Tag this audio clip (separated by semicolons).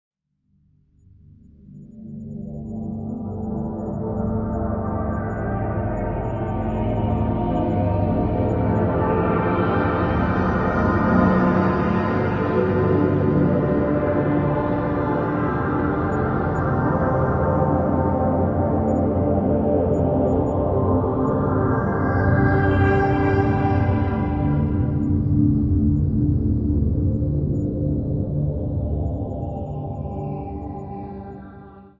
ambient; artificial; atmosphere; drone; evolving; MetaSynth; pad; soundscape